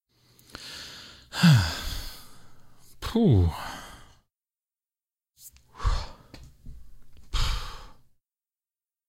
voice of user AS060822